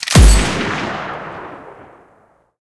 Recreation of the already iconic blaster sound from Officer K's handheld blaster in 'Blade Runner 2049'. I've layered a couple of rifle shots and applied some heavy compression along with other processing. I've layered the outcome with a processed 909 bass drum and compressed the whole thing again. The result: An ultra-heavy gun shot with lots of low-end.
Thanks to 'nioczkus' for one of the rifle layers.